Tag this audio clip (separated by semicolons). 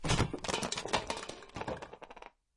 bang; Big; boom; can; close; Crash; dr-40; dr40; fall; falling; field-recording; garbage; hard; hit; impact; lid; loud; metal; metallic; microphone; ouch; percussion; room; rubbish; shotgun; tascam; trip